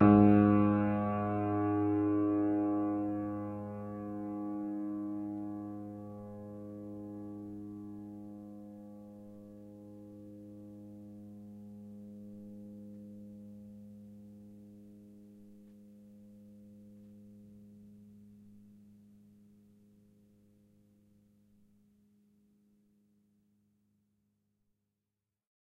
upright choiseul piano multisample recorded using zoom H4n
multisample, piano, upright, choiseul